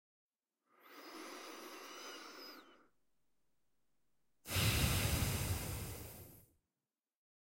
Deep Breath 1 2
Air, Breath, Breathing, Deep, Design, Field, Foley, Human, Person, Recording, Sound, Tired